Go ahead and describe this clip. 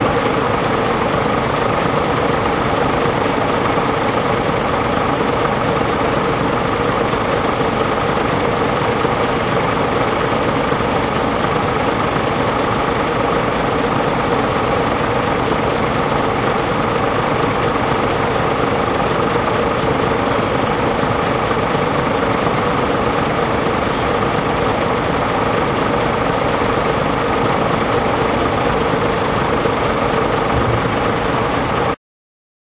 Truck engine running under
A running truck engine recorded from under the truck, using a Zoom H2n with the microphones with the microphones set for MS-recording. MS-decoding is done manually.
stationairy, diesel, industrial, engine, truck, field-recording